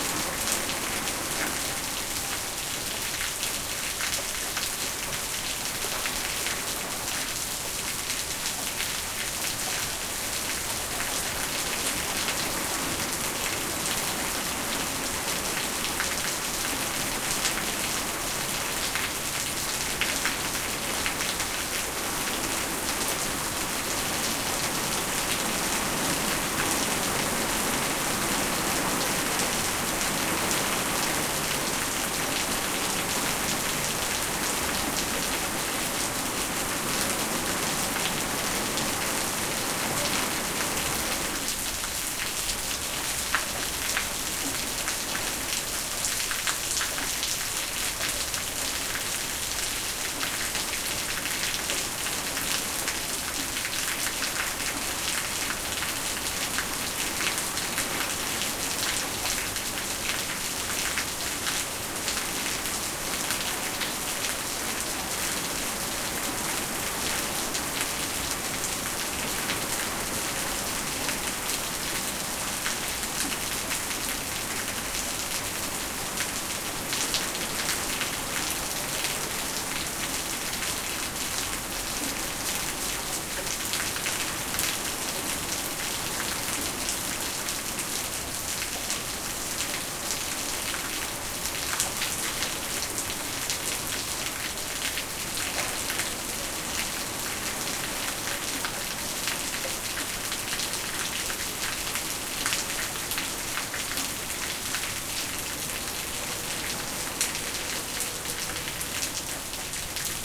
Heavy rain pouring with water stream on concrete. Recorded on a zoom h5 handy recorder
downpour, heavy, rain, shower, storm, weather